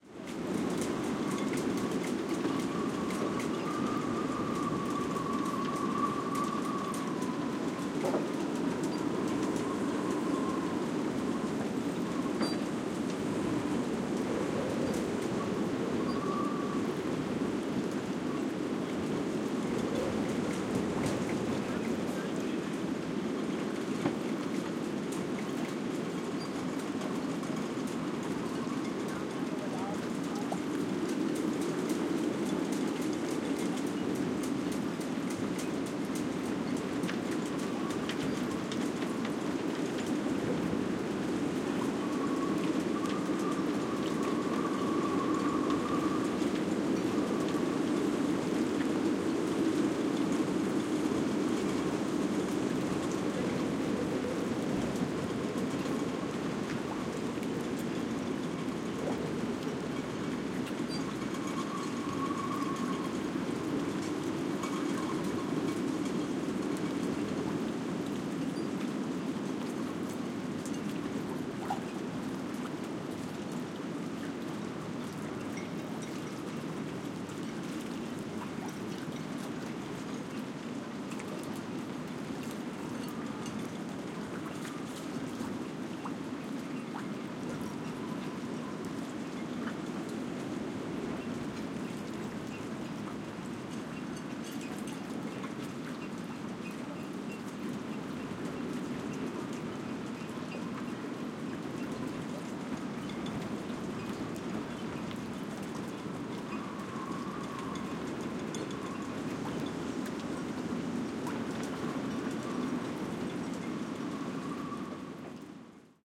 Stronger wind at a marina, rattling at masts and rigging of the yachts.
Stronger wind at a marina, the metal masts and rigging of the sailing boats rattle while they sway in the water and the wind whistles through the rigging.
there is a recording of the same marina with calmer wind.
boat, boats, clank, clatter, clink, clonk, harbor, marina, masts, metal, port, rattle, rattling, rigging, sailing-boat, water, whistling, wind, yacht